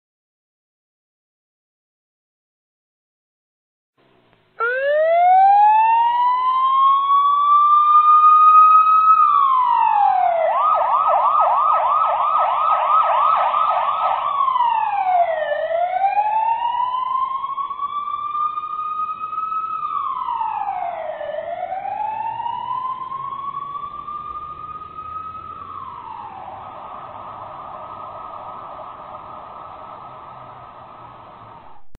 Police siren
Recorded from a street in the NYC Suberbs.
siren, car